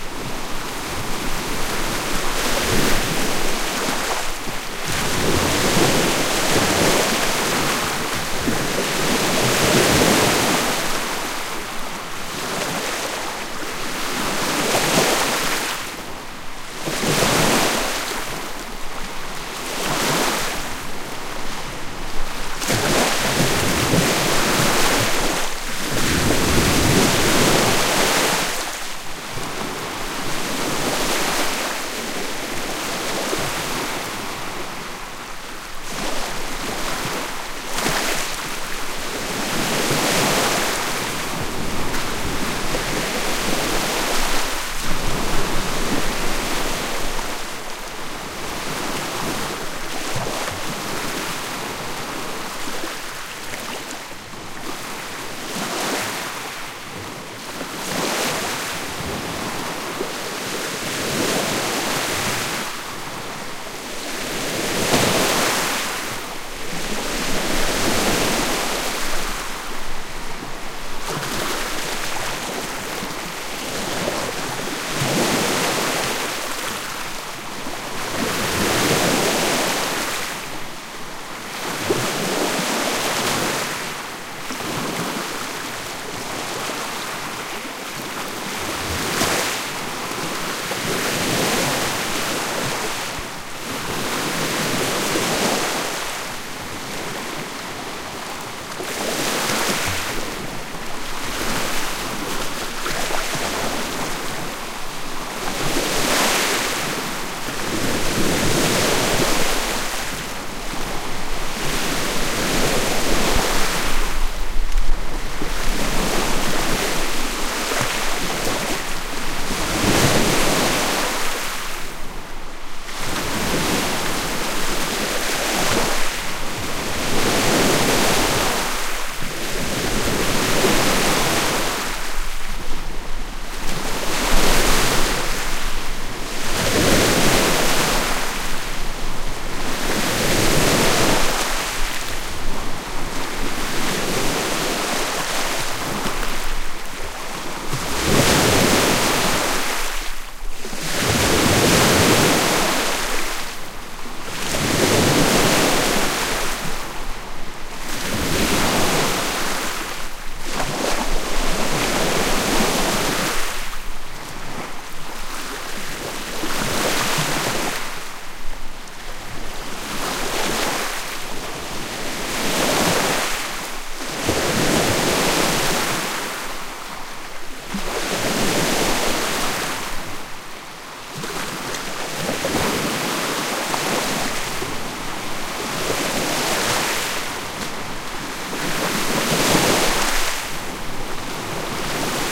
Surf loop 03-selection
lake-michigan, surf, waves